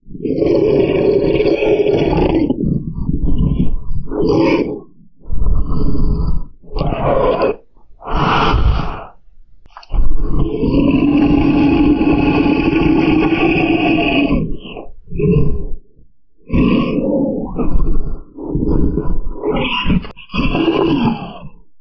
Growling Monster 002
A growling monster sound effect created using my voice and extensive pitch shifting in Audacity. Can be used for monsters, dragons and demons.
Demon, Dragon, Growling, Growl, Monster, Animal, Creature